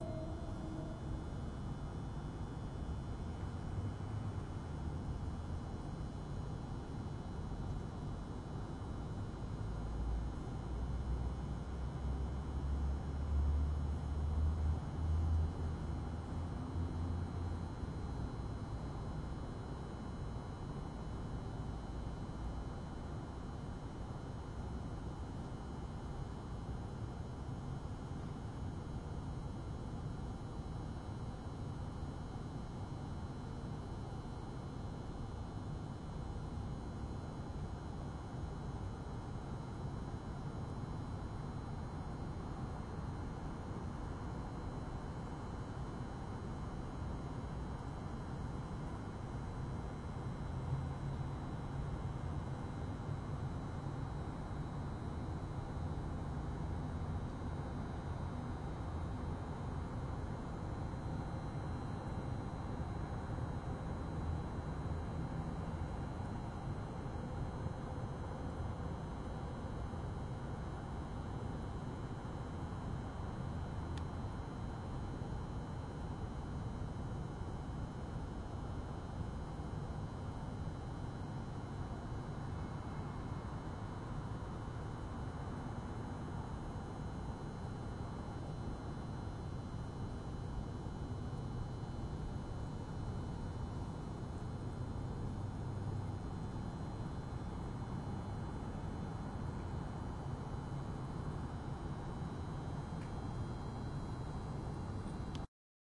Outdoors cabin substation
Late night city hum recorded near a cabin substation which gives that peculiar electric tone. Good thing none passed by at the time.
electric, field-recording, urban, snow, cabin, city, outdoors, ambience, substation, environment, low